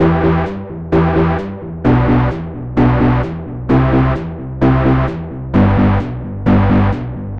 trance coder 7 Bangbang 130
A little more upbeat than the melodic one.
95, trance, techno, bpm